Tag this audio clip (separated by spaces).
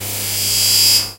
Closing Science-Fiction